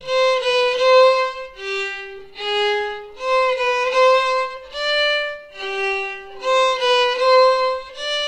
DWK violin slower
This is a violin sound (the theme of J.S. Bach Fugue c-moll from Das Wohltemperierte Klavier) pitched from C4 (262Hz) to D5 (587Hz), processed by a set of my "hand-made" DSP algorithms in C++ (spectral analysis/resynthesis, phase vocoder).
DSP, processed, Violin